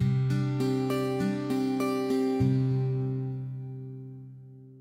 Acoustic Chord (No Low Key) 1st 7th
These sounds are samples taken from our 'Music Based on Final Fantasy' album which will be released on 25th April 2017.